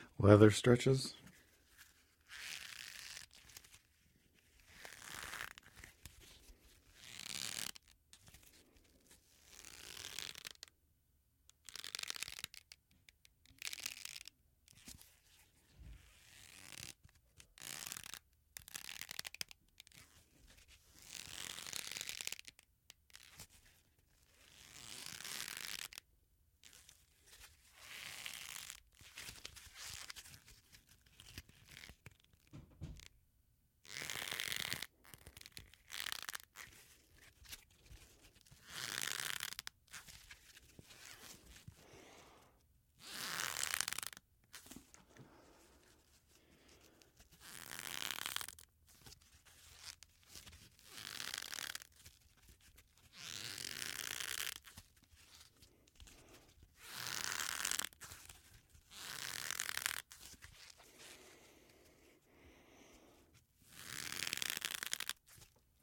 Recording of leather knife holder being twisted and turned. I recorded this for use as a slingshot being pulled back.
stretch, leather, foley, rubbery, twist, pull